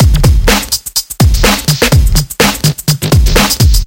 Breaks Pigpen Beat
big beat, dance, funk, breaks
breaks, funk, dance, beat, big